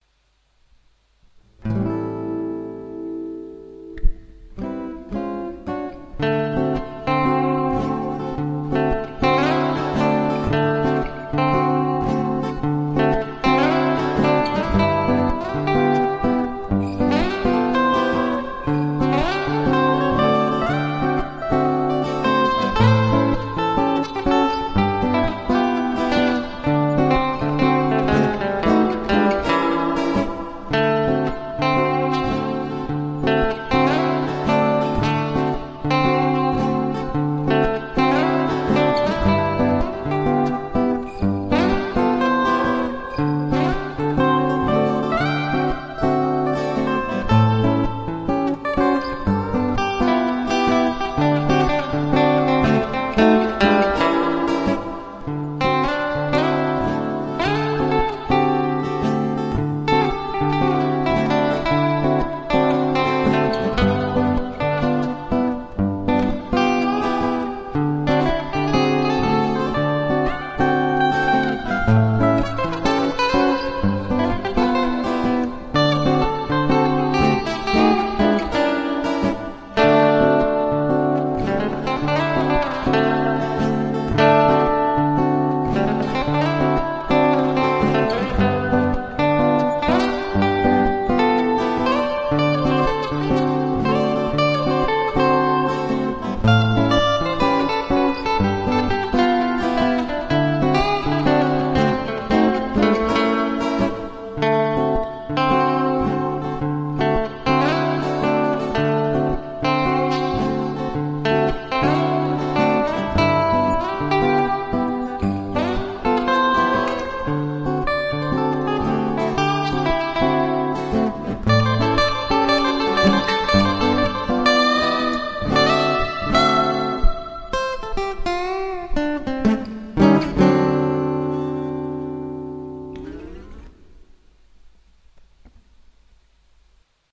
Instrumental jazz - rhytm and solo guitars.